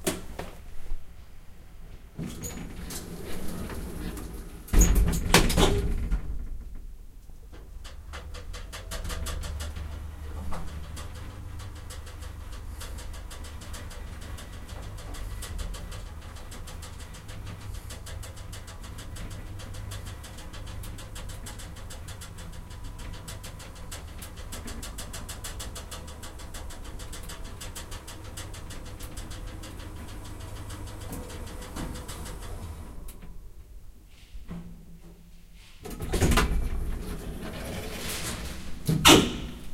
Going down from 5th to ground in an elevator.
Recorded with a Zoom H4N, edited with Audacity under Ubuntu Debian Gnu Linux.